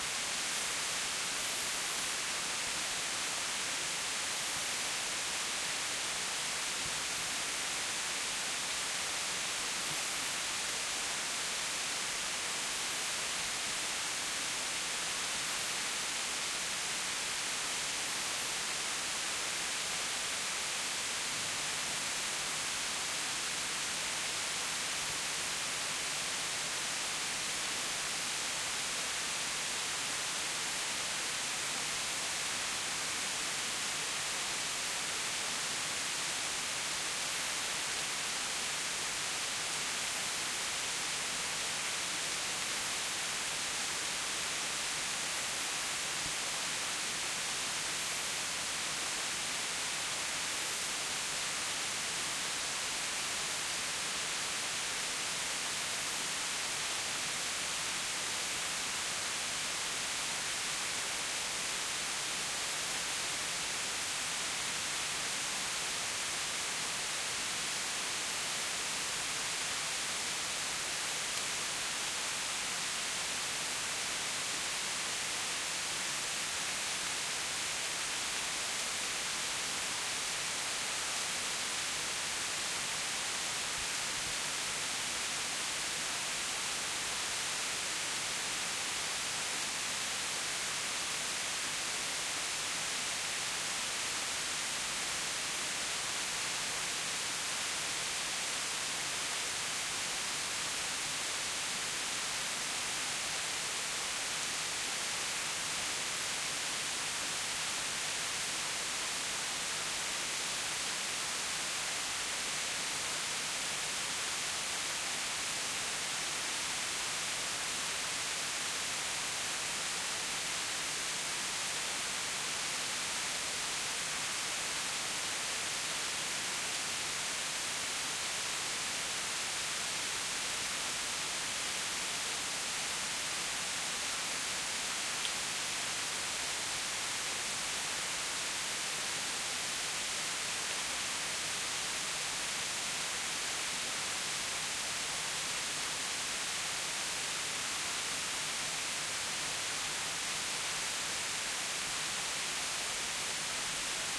Forest WildRiver Atmo
Normally this is a small creek in the forest - but this time after 4 Days of constant rain, the creek became a river - and ran wildly through the forest. This pack contains different recordings from further away and close up of the flowing creek. So could be useful for a nice soundmontage of getting closer to a waterstream or hearing iht from a distance.....